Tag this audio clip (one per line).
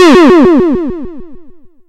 bright
rpg
sorcerer
wizard